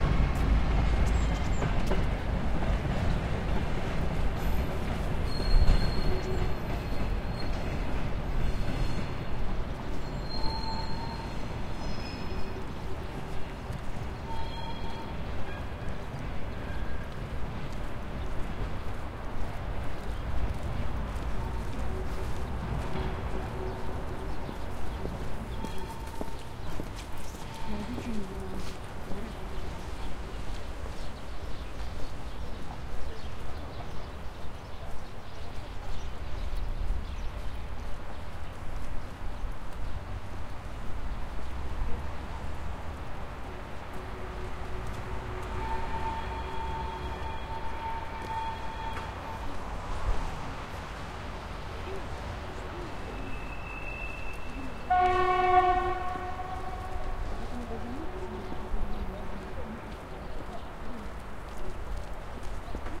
20131119 Street Novosib academ
Novosovirsk, academ gorodok street noise. Freight train leaves. Train whistle.
Recorded: 2013-11-19
XY-stereo.
Recorder: Tascam DR-40
ambiance, ambience, ambient, atmosphere, city, field-recording, noise, soundscape, street, train, train-whistle, whistle